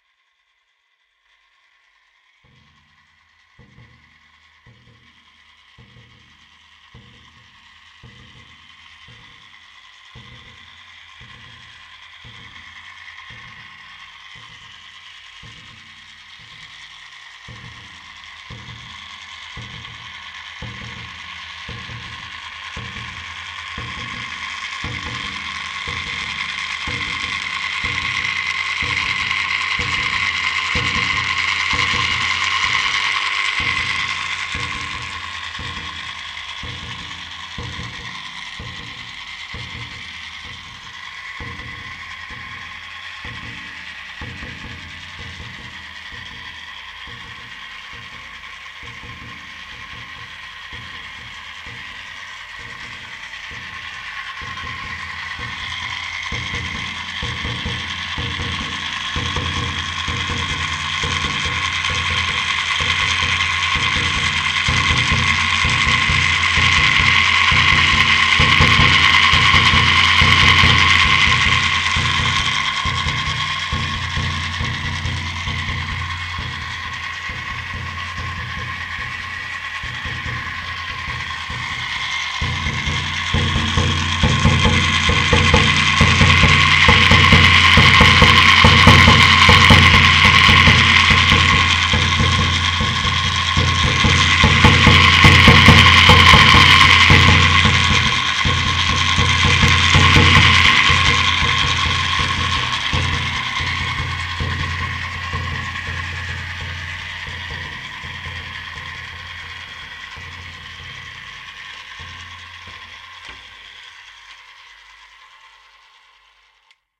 The year is 2122. You are hiding behind the winter jackets in a wardrobe while a hostile robocop is sniffing just on outside. You were paniced, but luckily the enemy passed.